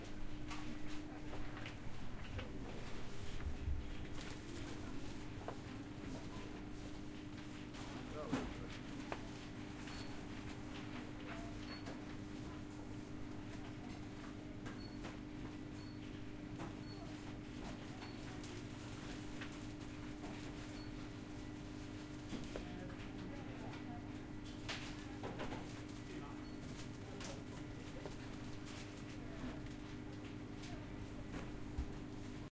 grocery store
Some customers. Bags. Distant beeps
bergen, h4n, zoom